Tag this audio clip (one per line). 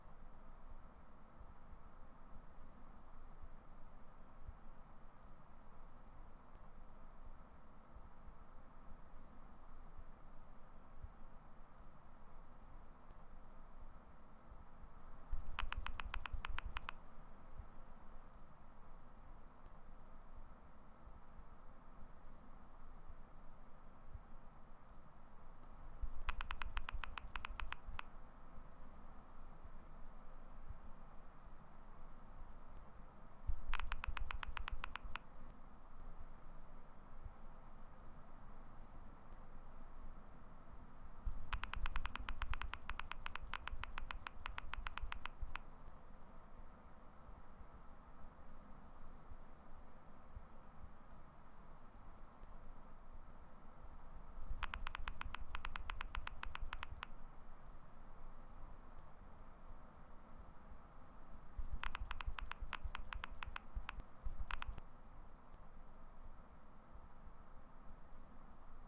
andriod
android
lg
phone
screen
smart
Texting
touch